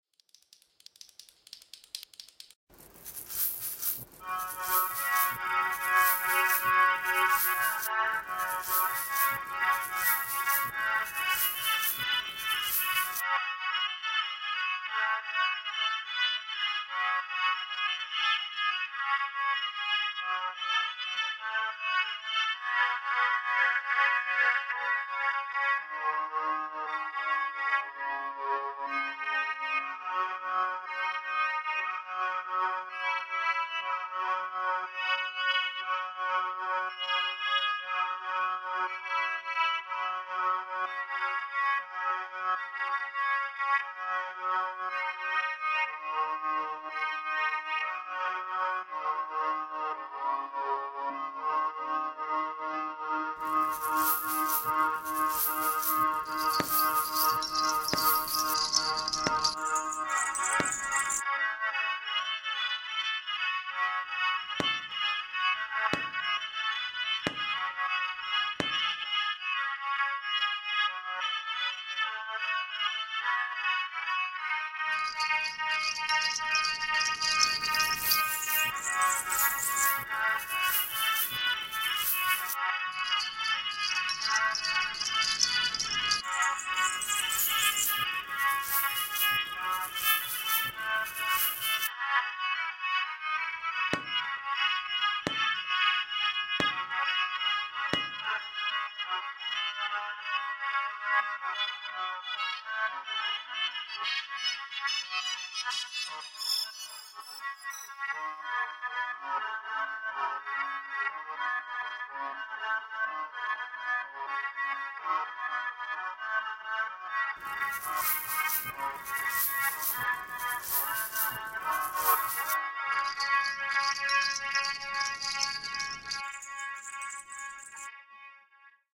newageisz tadeusz maszewski
Piece of sound production made by my student Tadeusz Maszewski. He paricipates in Ethnological Workshops. Anthropology of sound that I conduct in the Department of Ethnology and Cultural Ethnology at AMU in Poznań.